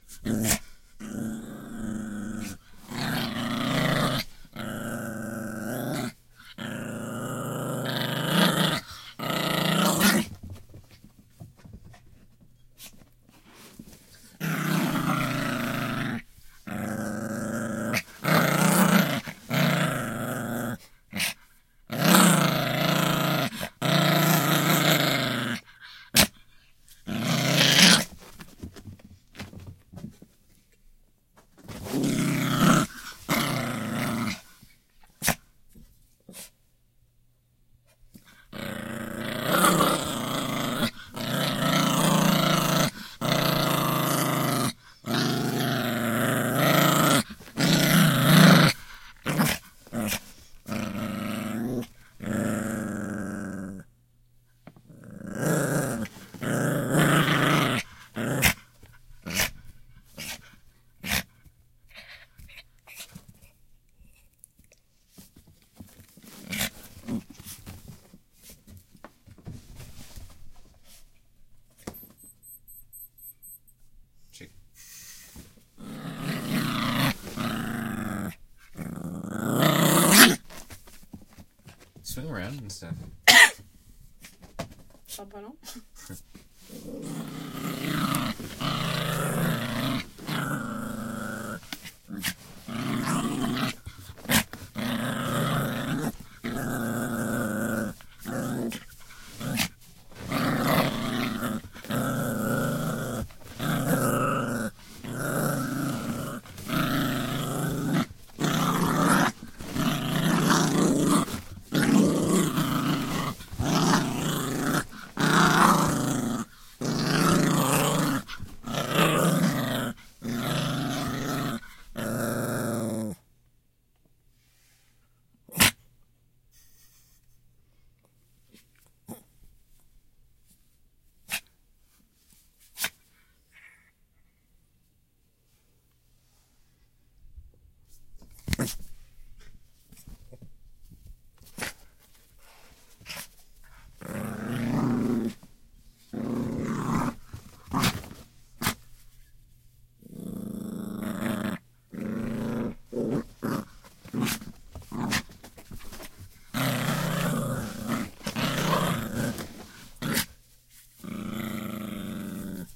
small dog (maltese) growls snorts cu